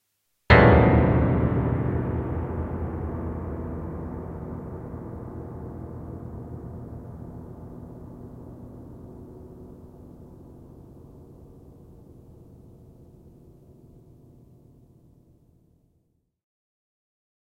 All 88 Final
Simultaneous sounding of all 88 piano keys at a constant velocity using Native Instrument's "The Grandeur".